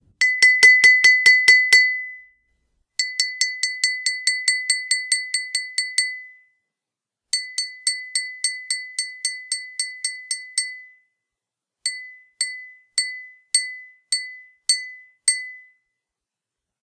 spoon tapping glass
The sound of a spoon tapping on a glass, producing a clear ringing sound. Often used to get attention at formal occasions or large dinner settings.
Glass, Ringing, Spoon, Tapping